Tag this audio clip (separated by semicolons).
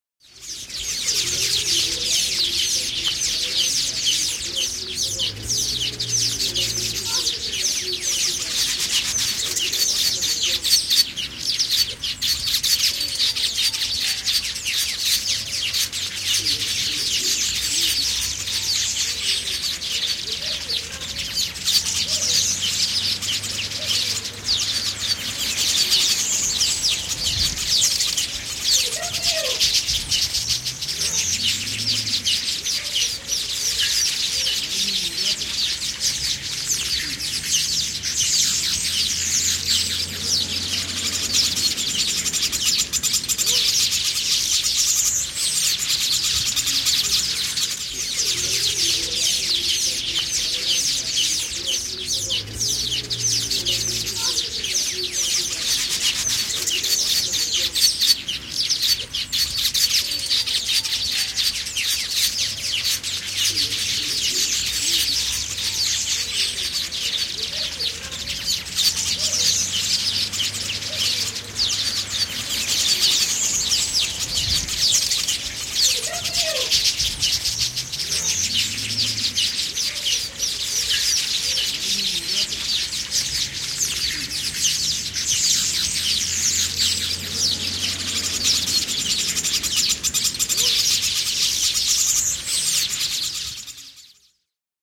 Field-recording; Sirkutus; Finnish-Broadcasting-Company; Chirp; Luonto; Yle; Linnut; Nature; Afrikka